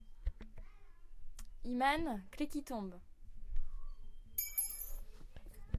Sonicsnap LGFR Alicia Inès Iman Yuna
Field recording from Léon grimault school (Rennes) and its surroundings, made by the students of CM1-CM2 (years 5).